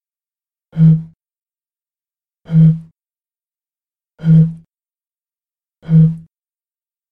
Whiskey Jug Whistle
Blowing on a whiskey jug for a whistle. Effects: Noise Reduction. Recorded on Conexant Smart Audio with AT2020 mic, processed on Audacity.
cajun, horn, whistle, whiskey, blow, jug